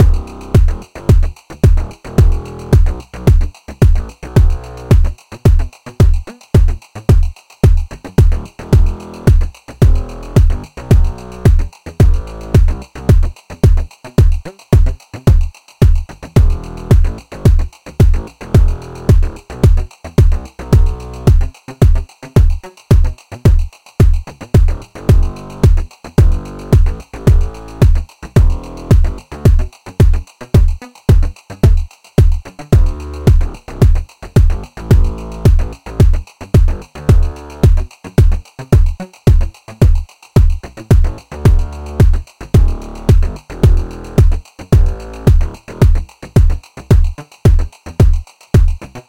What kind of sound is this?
KickingLoop 110bpm
Output from an analog box circuit I threw together to experiment with layering different components to get a satisfying kick drum sound. To complete the picture, it has not only a bass line (which alternates between a staccato and legato style) but also some tick-tocky kind of metallic rhythm thingie. All of these sounds and their control are the result of running the Analog Box circuit. I suppose this could be used as the core of a more useful musical piece, if you can figure out how to follow what the bass is doing. :-) I did cut it such that it could loop (using Cool Edit Pro), and you could pretty easily re-cut it elsewhere, but it's not as long as you might prefer.
kick, synthetic